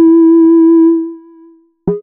Basic triangle wave 2 E4
This sample is part of the "Basic triangle wave 1" sample pack. It is a
multisample to import into your favorite sampler. It is a really basic
triangle wave, but is some strange weirdness at the end of the samples
with a short tone of another pitch. In the sample pack there are 16
samples evenly spread across 5 octaves (C1 till C6). The note in the
sample name (C, E or G#) does indicate the pitch of the sound. The
sound was created with a Theremin emulation ensemble from the user
library of Reaktor. After that normalizing and fades were applied within Cubase SX.
experimental, multisample, reaktor, triangle